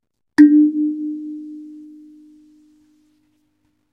all 7 pingy thingies pinged in sequence on a coconut piano bought in horniman museum